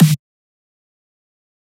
Dubstep Snare 3
A lower pitched snare that I made off of the stock drum samples in fl studio.
adriak, dnb, drum-and-bass, Dubstep, FL-Studio, glitch, hard, heavy, hip, hop, pitched, processed, punchy, skrillex, snare